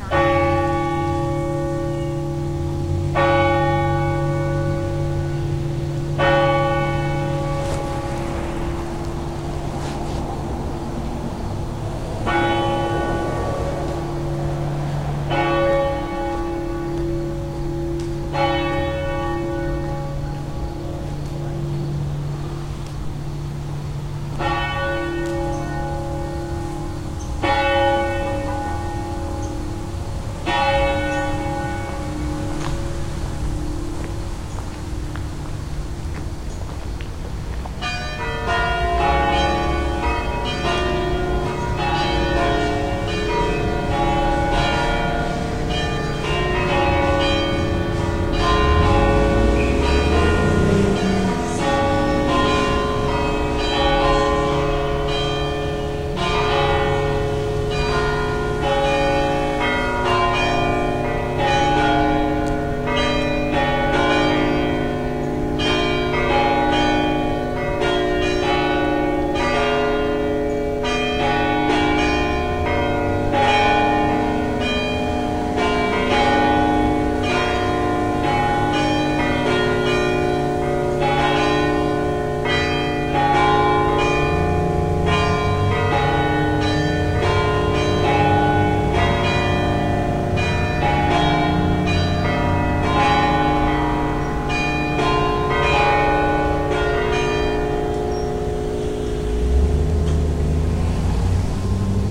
Bells ring at St. Benedict's Abbey in Atchison Kansas for the noon hour. I'm sitting on the steps facing Division Street between 2nd and 3rd. The bells begin to ring and I get up and slowly walk east to get a bit closer to the sound. You hear my cane tap a couple times in the background. Some traffic is heard on Division which is busy on a Saturday. You can hear a few crickets in the background. A crow caws in the distance near the beginning, a distant call from another bird is heard a bit later. Recorded in Goldwave with an Asus laptop in my backpack with Microsoft Lifecam 3000 poking out of a small compartment and clothespinned to the bag to cut down on noise when I walk. It has a borrowed sock on it to block out the wind. The only production is a (reduce peaks) with Goldwave's native compressor and raised the overall volume. This is part of a 40 minute walk I made from home to the Abbey and back to get a good recording of the bells. I'll post more of the walk later.